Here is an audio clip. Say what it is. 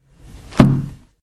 Closing a 64 years old book, hard covered and filled with a very thin kind of paper.
book, household, lofi, loop, noise, paper, percussive